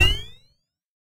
STAB 018 mastered 16 bit
An electronic percussive stab. A little electronic pitch sweep. Created
with Metaphysical Function from Native Instruments. Further edited
using Cubase SX and mastered using Wavelab.
electronic, percussion, short, stab